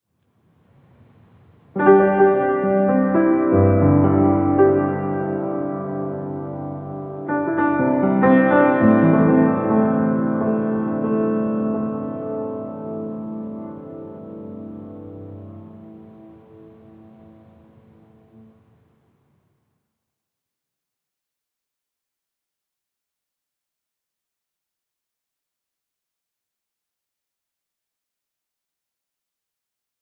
lonely-dance-riff2
movie, cinematic, sad, dane, lonely, film